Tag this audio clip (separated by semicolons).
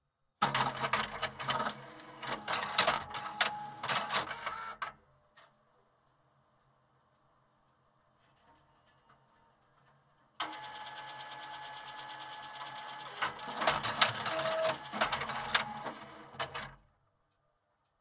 machine; mechanical; printier